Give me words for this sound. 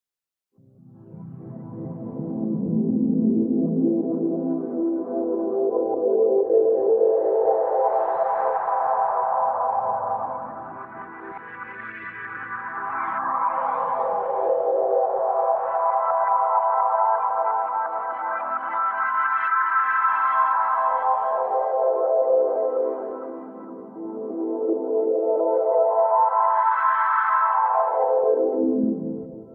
A luscious atmosphere made by adding various wet delay and reverb effects to a pad sequenced with a chord